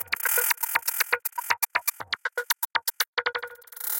20140306 attackloop 120BPM 4 4 23rd century loop3b
This is a variation of 20140306_attackloop_120BPM_4/4_23rd_century_loop1 and is a loop created with the Waldorf Attack VST Drum Synth. The kit used was 23rd century Kit and the loop was created using Cubase 7.5. The following plugins were used to process the signal: AnarchRhythms, StepFilter, Guitar Rig 5 and iZotome Ozone 5. The different variants gradually change to more an more deep frequencies. 8 variations are labelled form a till h. Everything is at 120 bpm and measure 4/4. Enjoy!